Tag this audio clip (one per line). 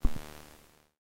crackle
ether
fx
noise
soma